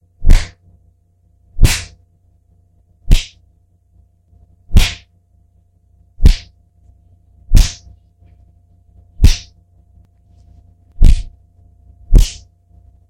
I think I finally discovered the secret to stereo typical punch sound effects. After hitting myself in every spot I could think of, I found that by punching my hand into my fist and adding a ton of bass boost produced just what I was looking for. Enjoy!
sock, belt, slap, mogul, punch, bully, whip, hit, indy-mogul, fist, bfx